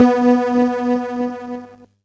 Piano C2 CHO
These are the "Instrument" sounding sounds from a broken keyboard. The
name of the file itself explains spot on what is expected.
16, 44, from, hifi, homekeyboard, lofi, sample